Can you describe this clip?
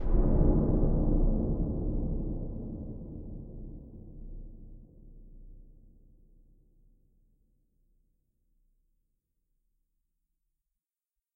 Horror Evil Round the Corner
Your audience will jump on the chair when they see your evil villain appear with this sound!
This sound was generated with Audacity.
08/02/2015, London